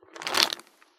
Shake, Pepples, Fill Roll
Foley for a bug scurrying along rocks in a glass tank. Filling in the empty background with light shuffling pebble sounds.
Made by shaking a plastic jar of almonds.
toss, sound, nuts, falling, Foley, sift, terrarium, dice, creature, shake, shuffle, bug, pebbles, rocks, jar, scurry